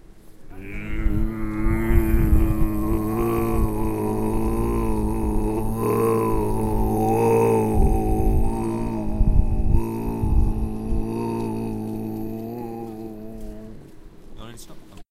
LEE RdR CT TI01 rrgrgrgrrrrrgrr
Sound collected in Leeuwarden as part of the Genetic Choir's Loop-Copy-Mutate project.